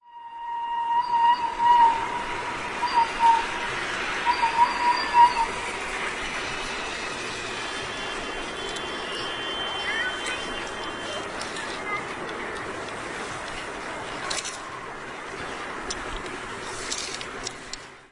20.09.09: between 18.30 and 18.50. the narrow-gauge railway called the children railway near of the New Zoo in Poznan and the recreation center 'Malta'. The specific sound produced by the little train.
narrow-gaugerailway, poznan, train, zoo